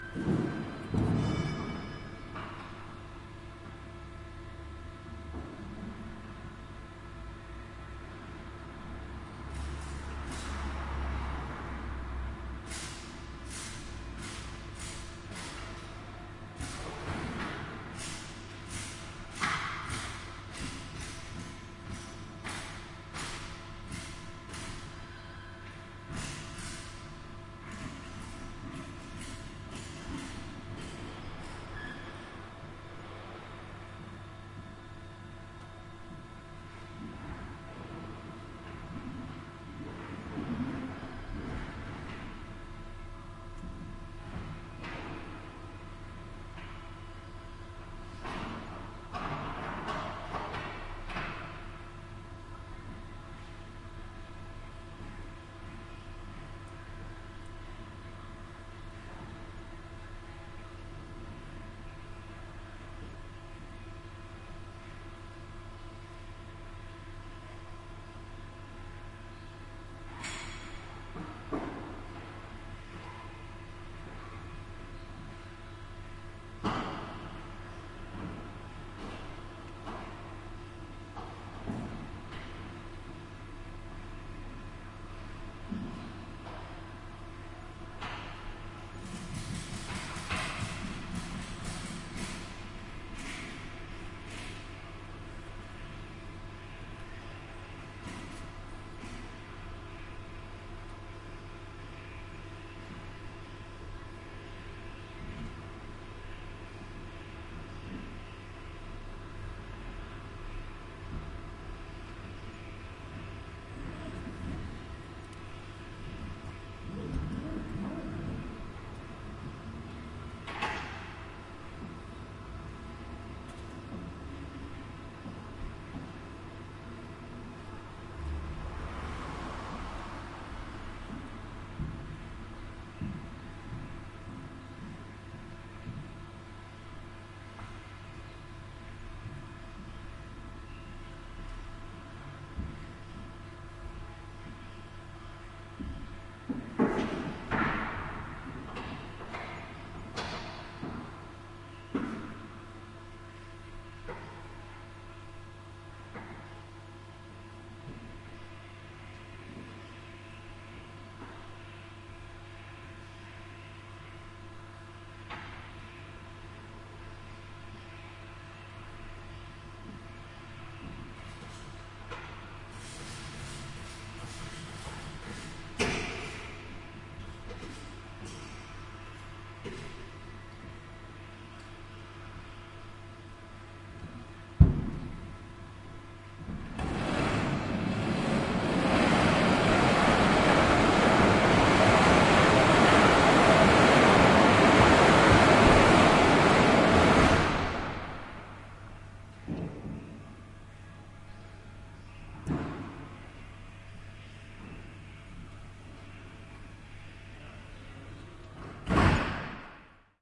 110816- knocking-off time in hilden
16.08.2011: seventeenth day of ethnographic research about truck drivers culture. Hilden in Germany. Steel company. The knocking off time. ambience: passing by cars, muffled sound of machines, some banging, rattling.
bang, cars, crack, radio, steel